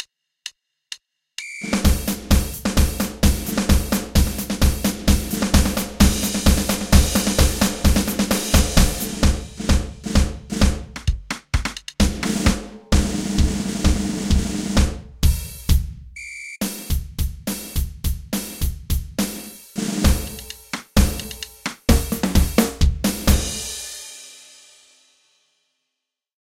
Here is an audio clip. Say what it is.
FX comedy marching
Military-style drum music for a comedy marching routine.
Played by me on a Yamaha Motif 7 music workstation.